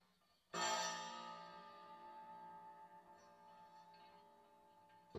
Inside piano contact mic coin scrape
A coin scraping recorded inside a piano with a contact mic